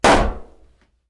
Balloon-Burst-04
Balloon popping. Recorded with Zoom H4
balloon, burst, pop